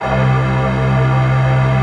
Synth Strings through home-made combfilter (32 Reason PEQ-2 two band parametric EQs in series). Samples originally made with Reason & Logic softsynths. 37 samples, in minor 3rds, looped in Redmatica Keymap's Penrose loop algorithm, and squeezed into 16 mb!
Combfilter, Multisample, Strings, Synth